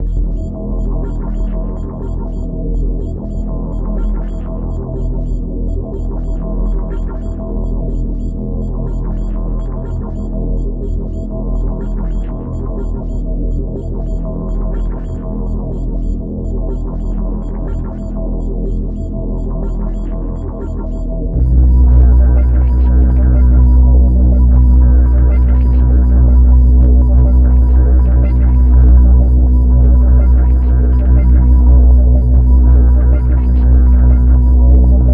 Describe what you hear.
Voyage Into Space- A Bass Drone Synth
Imagine you just landing on an unfamiliar planet, where the local on the planet is just some unpopulated area with a open wide field or jungle. You don't see any one, or anything, but either dust,trees, grass, or a vacant road. While your wondering through this place you also notice that that nightfall is approaching, and you can see the moon and the starts of this planet. This synth patch is just the sound for that, a drone bass synth patch used to create some imaginary audience feel that would lead you or someone to be driven to madness. This patch was made in Massive.
Drone, Ambient, Synth, Bass